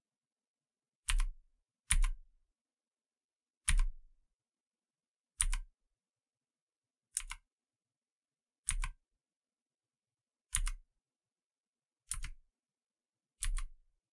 Single clicks Keyboard Sound
click, typing, keyboard